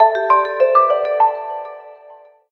Ringtone: Tizzy
A 2.5 second ringtone created in GarageBand
alert, ringtone, cellphone, alarm, ring, ring-tone